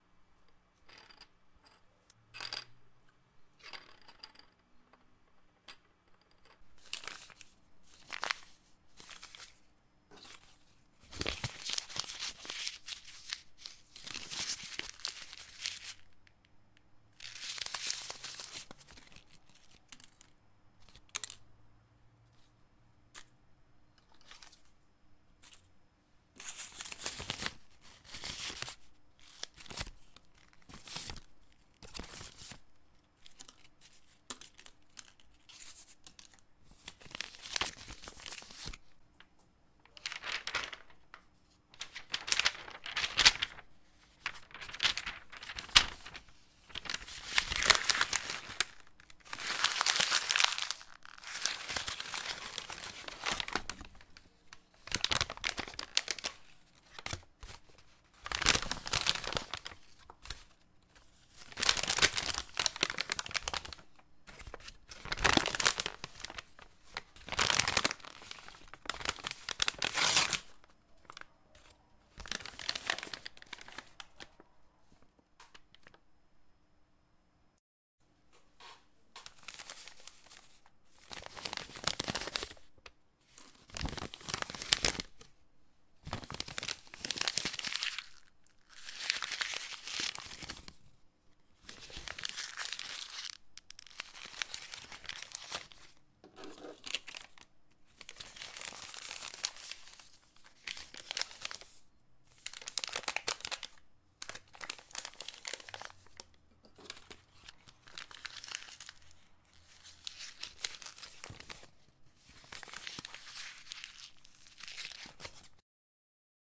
Paper being shuffled around, pencils being rolled on a table, and misc. office equipment being handled on a table. Useful for cropping out one or two sounds for your projects. I created this as foley for a stop-motion film project. I'll also upload a few individual sounds cut from this roll.